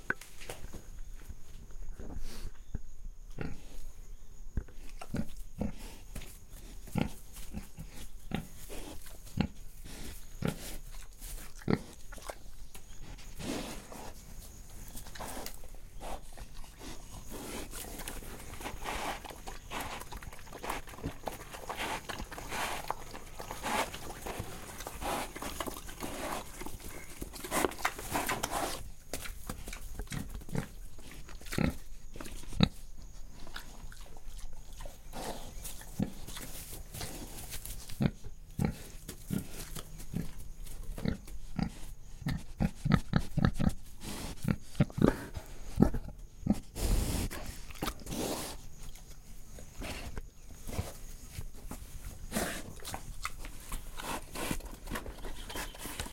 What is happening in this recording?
Stereo Recording of Pig Breathing Close-up with Zoom H4N's own In-Built Microphone.